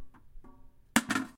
Me hitting metallic bucket with wooden stick. Recorded with Zoom h1n.
stick, Bucket